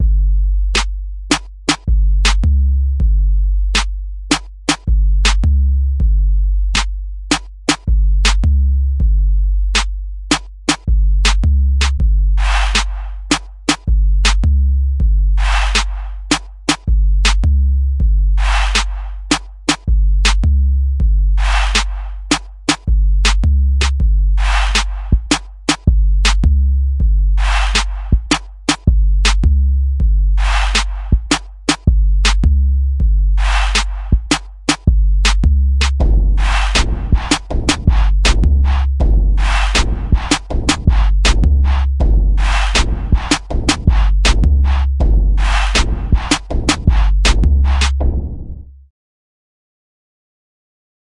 Down South Tempo Drum Loop 80 - Nova Sound
80, Cymbal, Dance, Down, Drum, Drums, EDM, Effect, Electro, FX, Hat, Hi, House, Loop, Nova, NovaSound, Sample, Snare, Sound, South, Tempo, The